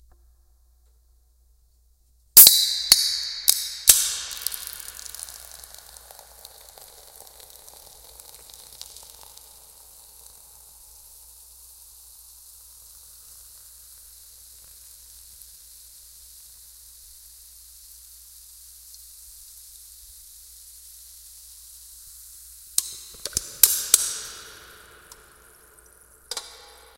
Soda Can Flint and Steel
Soda Can effect edited to sound like flint and steel lighting a fire
Flint, Steel, Soda